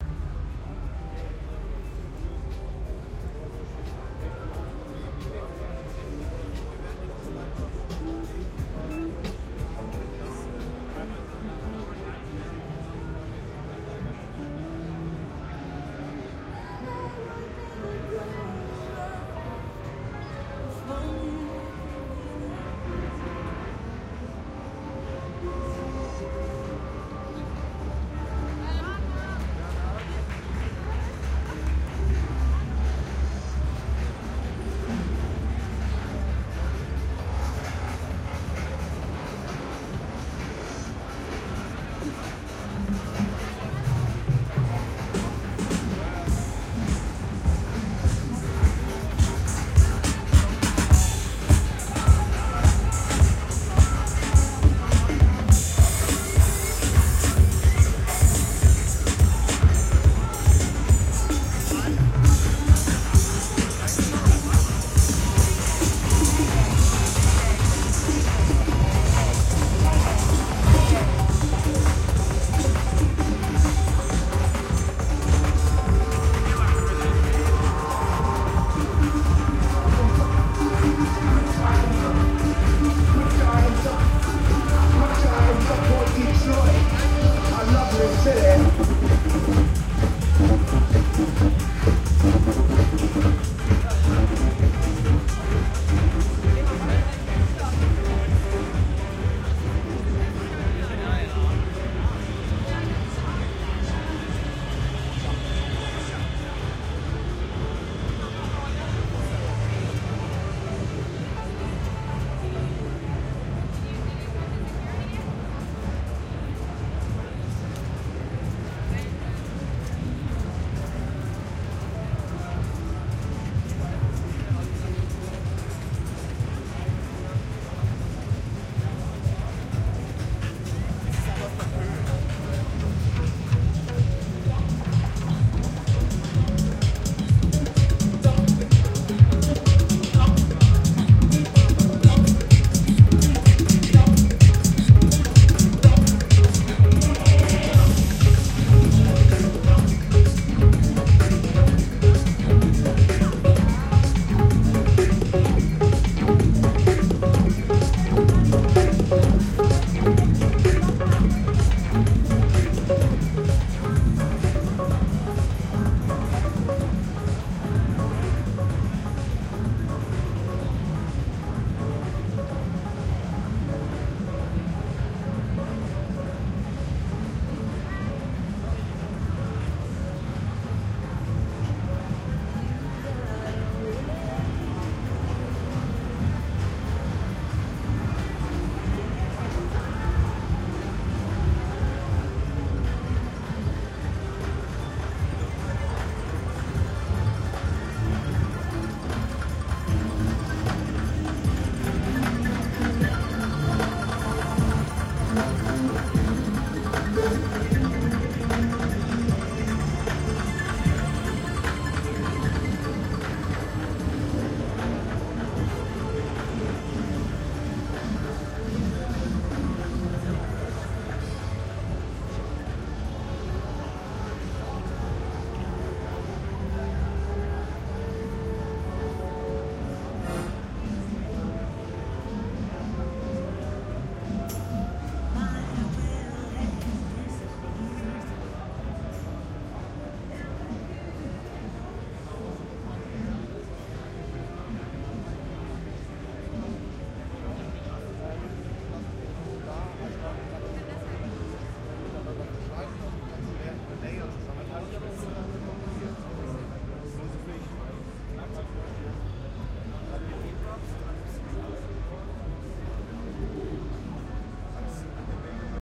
Musikmesse 2011 Walkaround
Binaural recording during a short walk-around at Musikmesse 2011. Recording chain - Panasonic wm-61a capsules - Edirol R09-HR recorder.
binaural, crowd, live, music, musikmesse, trade-show